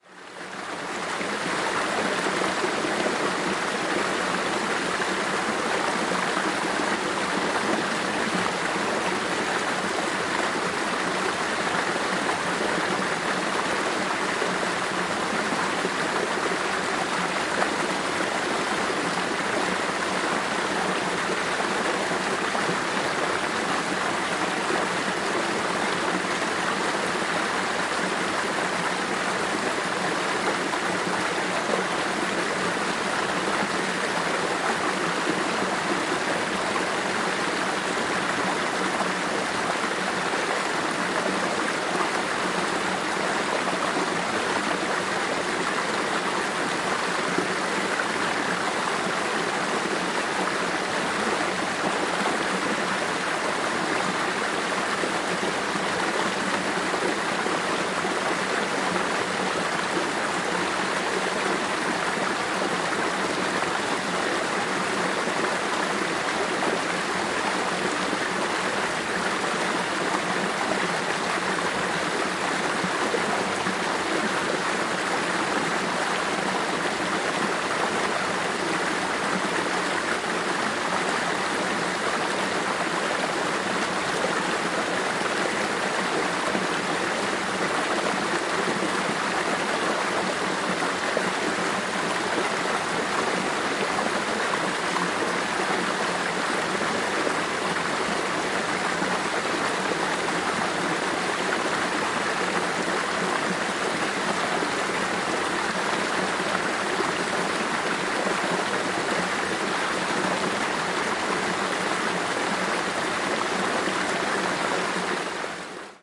Forest river flows over rocks
Water from the river flows over big rocks.
Recorded in Ticino (Tessin), Switzerland.